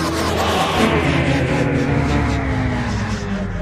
Synth Sample 2
A sample a made with a vocal loop and my acoustic guitar in the background with effects over everything.